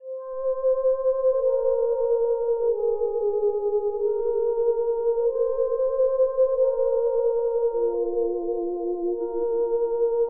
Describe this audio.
pianowave 140bpm
Ive been trying to work on my loops and thus am starting with piano riffs and softer more smooth rifss
tell me if you use it for anything :D
140, bpm, buzz, classical, cool, core, fast, guitar, hard, house, moving, piano, rock, smooth, soft, sweet, techno, trance